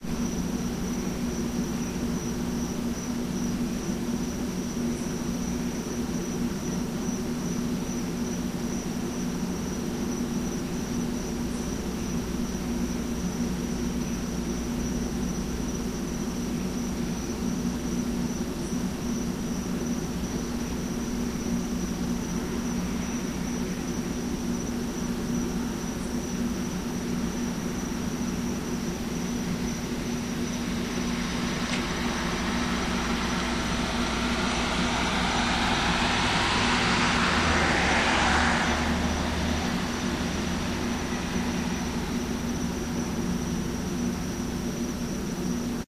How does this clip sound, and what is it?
police chopper999 goneloud
Police helicopter and a dozen cop cars, including a K-9 unit searching the hood, recorded with DS-40 and edited in Wavosaur. Higher microphone sensitivity as things calm down.
chopper, field-recording, helicopter, manhunt, police, search